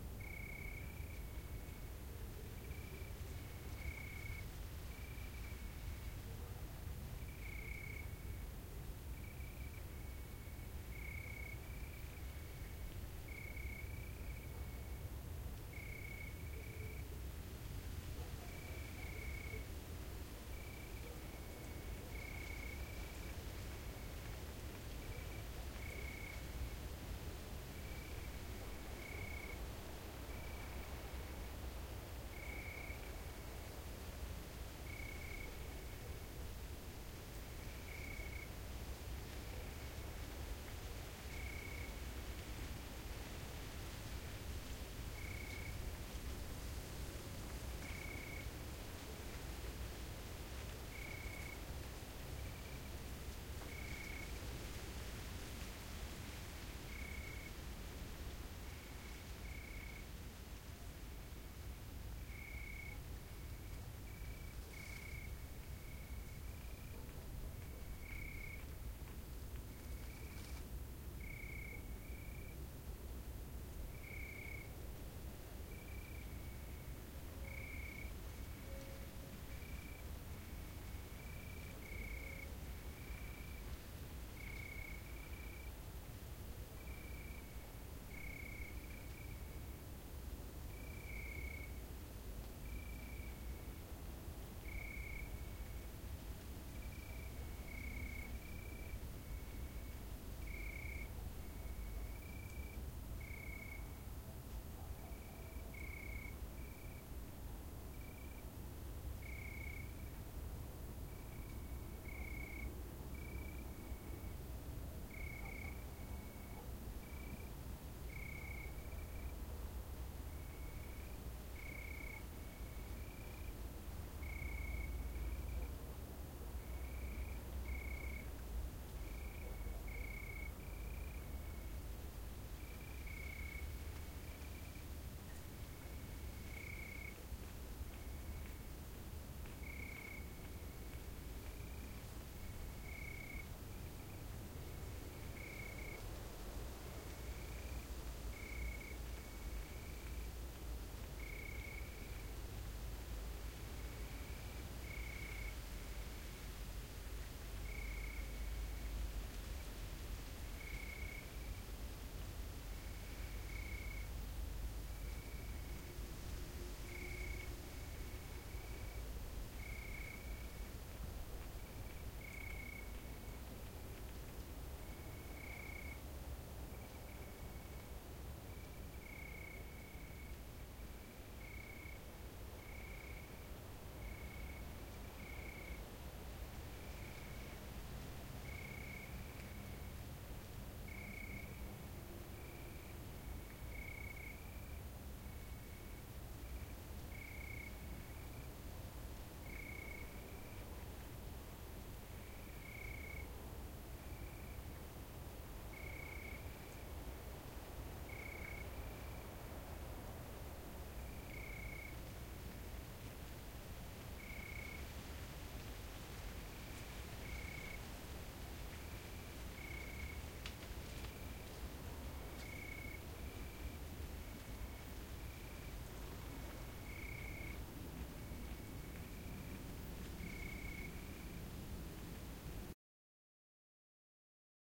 Night crickets
Night sounds with crickets.
crickets, night, ambient